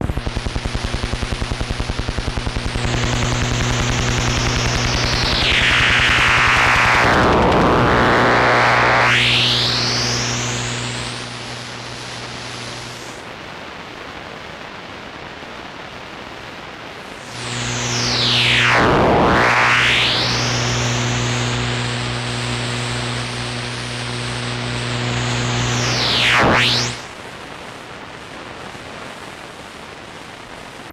Radio Noise 6
Some various interference and things I received with a shortwave radio.
Radio, Radio-Static, Static, Interference